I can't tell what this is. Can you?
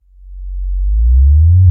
reverse bass
just a reversed 808